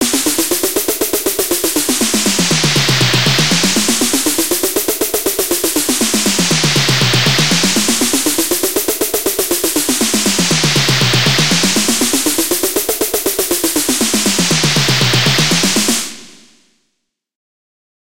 Just a drum loop :) (created with Flstudio mobile)
beat, drum, drums, dubstep, loop, synth